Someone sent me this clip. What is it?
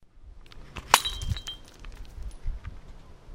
Glass Smash 6
One of the glass hits that I recorded on top of a hill in 2013.
I also uploaded this to the Steam Workshop: